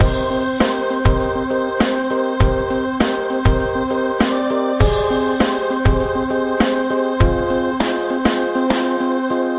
Loop CoolDude 05
A music loop to be used in storydriven and reflective games with puzzle and philosophical elements.
game, gamedev, gamedeveloping, games, gaming, indiedev, indiegamedev, loop, music, music-loop, Philosophical, Puzzle, sfx, Thoughtful, video-game, videogame, videogames